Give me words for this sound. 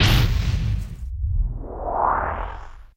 Sampled from my beloved Yamaha RM1x groovebox (that later got stolen during a break-in).
atmospheric, effect, fx, noise, odd, sample, sci-fi, space, strange, weird, Yamaha-RM1x